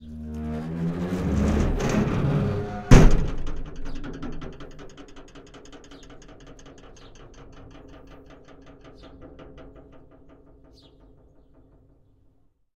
Large metal gate squeaks rattles and bangs.